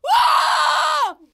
A scream of a girl for a terror movie.